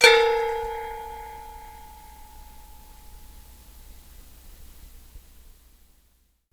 PliersBottom3a-SM58-2ftAway-Pitch-1-Formant-4
I struck the bottom of a wire suspended 9 1/2-inch pressed steel commercial mixing bowl.
I struck the bowl's bottom with a pair of 8-inch Channellock steel pliers.
The audio was recorded through a Shure SM58 stage microphone, through a Roland VT-3 Voice Transformer into Audacity.
The main Pitch in the VT-3 were set down by approximately 20% of an octave.
The Formants on the VT-3 were set down by approximately 80% of an octave.
Detune, Formant, Pitch